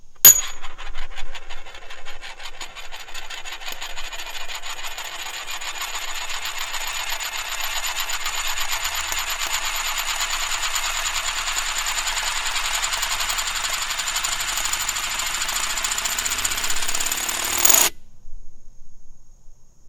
Disc Aluminum, 3 in, On Glass Table Clip1
Spinning 3 inch diameter x 1/8 inch thick aluminum disc on a glass table. Recorded in mono with an Edirol R44 recorder and a Shure SM81 microphone.
table, glass, roll, metal